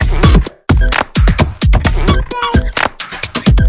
Hiphop/beats made with flstudio12/reaktor/omnisphere2
loop, pack, 130bpm, hop, trip, hip, beat, glitch, drumloop, drum